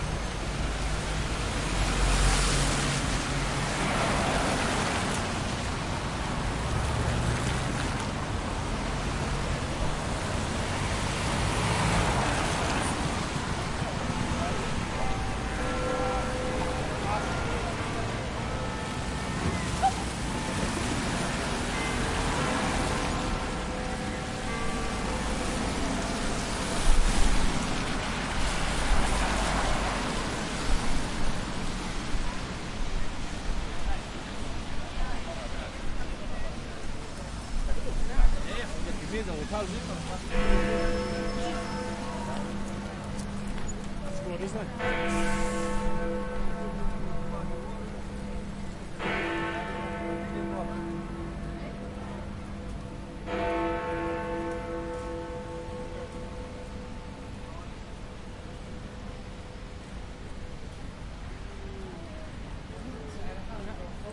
Big Ben 4.00pm (With Traffic)
city, field-recording